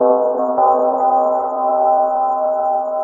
amb klok 2
a dark bell sound